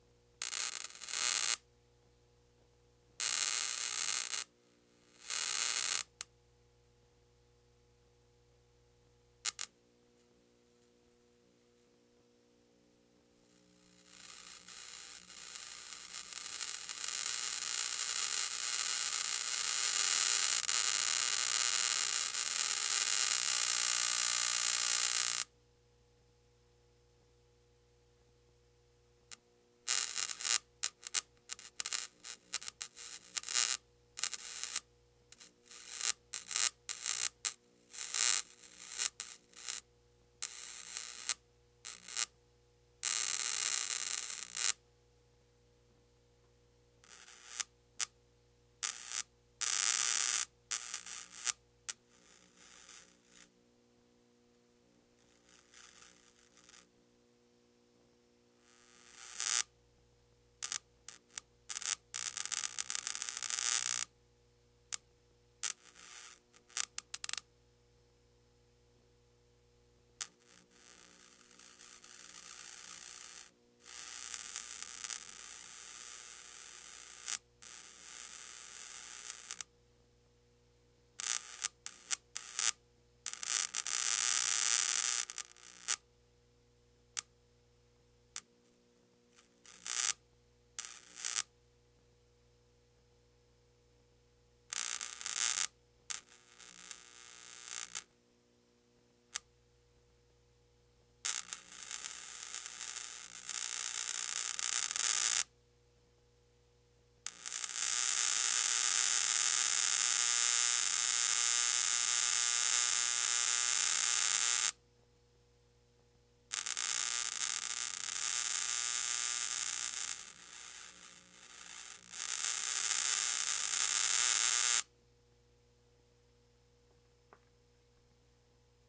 Bug Zapper2
I found that a good way to get cool electrical sounds is with any commercial bug zapper.